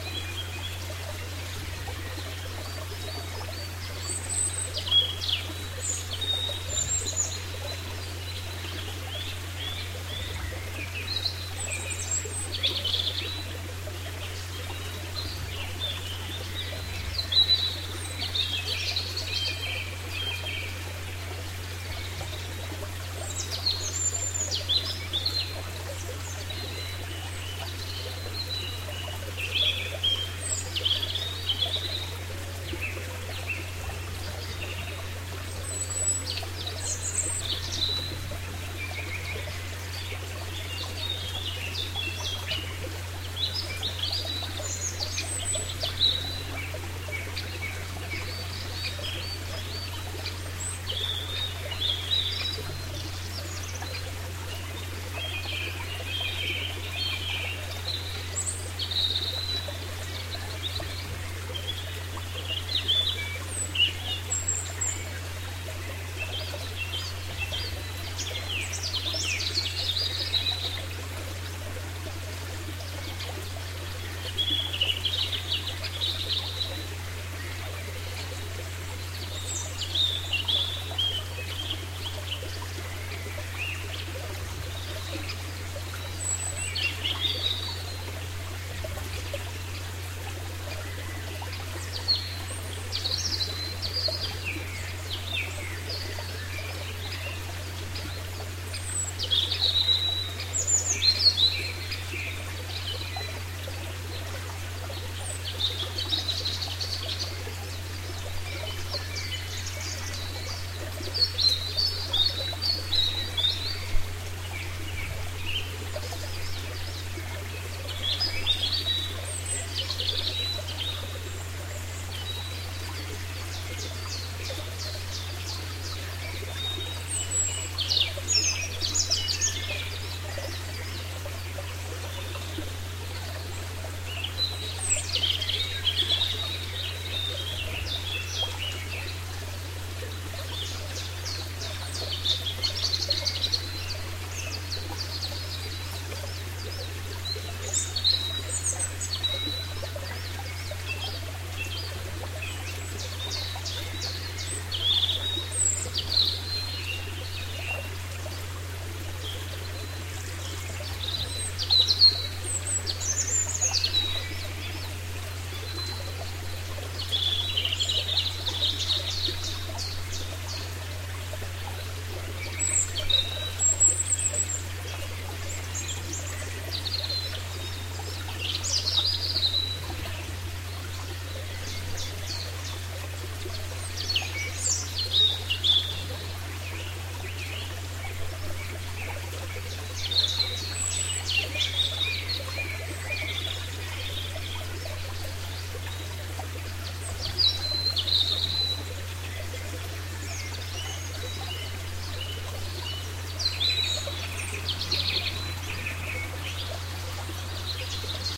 Birds singing at stream
Beautiful forest ambient: birds are singing and a stream flows
nature, ambience, birdsong, water, spring, forest, ambient, birds, field-recording, stream